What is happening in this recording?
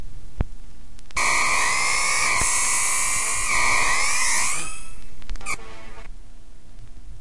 sound-design done in Native Instruments Reaktor featuring light hiss combined with a loud noise in the middle of the 2-bar loop
noise, loop, sound-design, electronic, crackle, hiss, 2-bar, industrial, loud